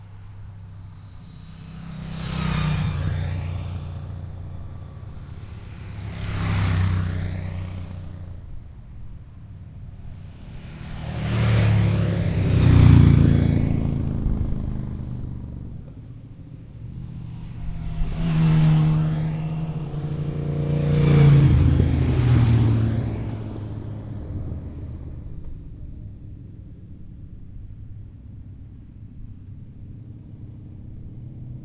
Motorcycles passing by recording using a Zoom H2